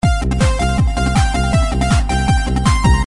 Fusion loop 2b
trance, drum, drumloop, beat, loop